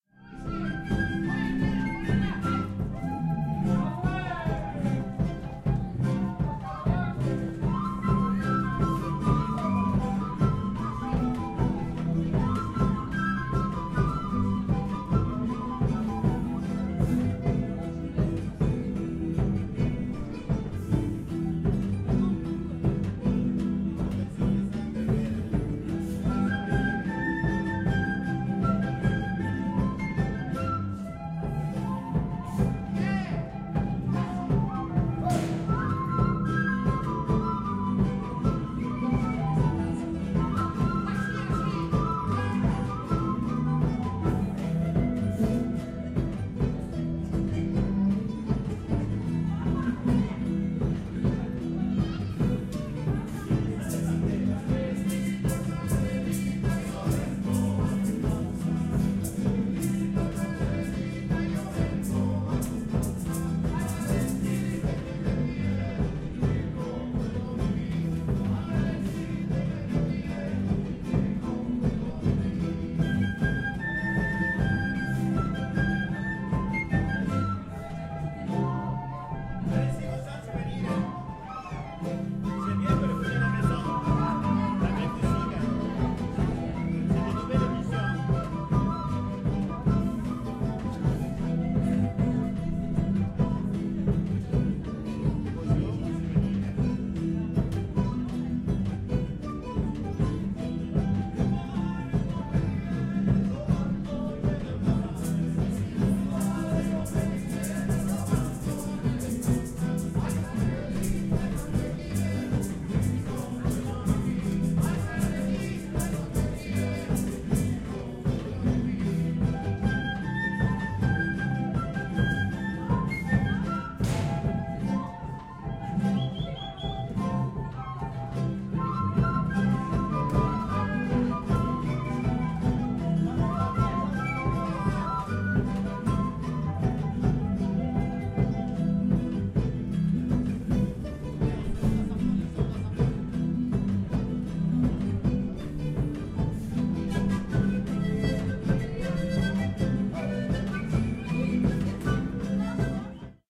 indian band playing at Montparnasse metro station
Sample recorded with ZOOM H4 at night on Montparnasse metro station in Paris.